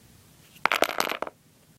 wood impact 14
A series of sounds made by dropping small pieces of wood.
block, crash, drop, hit, impact, wood, wooden